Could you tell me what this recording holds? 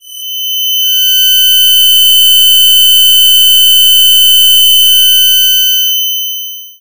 a tinnitus, maybe after a closeby explosion